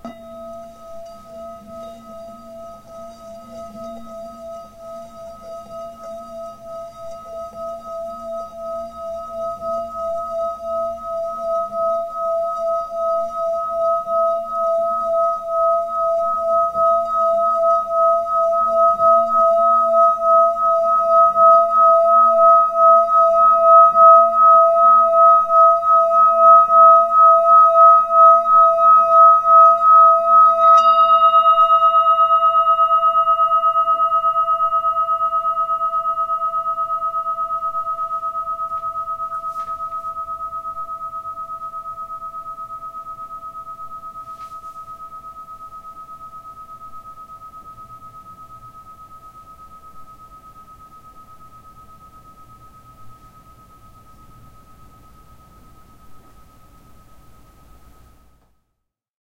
singing-bowl-wood01
This is the sound of a singing bowl played with the wood part of the mallet. This is supposed to match the third chakra, also known as the throat chakra. However, I'm far from being a buddhist specialist.